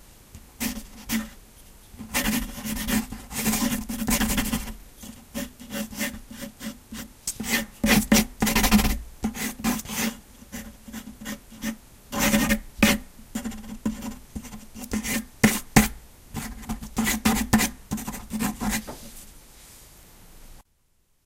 Recording of a fast sketch done with soft (2B) pencil on rough paper. The paper is attached to a wooden board. Equipment: cheap "Yoga EM" microphone to minidisc, unedited.
sketching, rough-paper, drawing, paper, pencil, wood, field-recording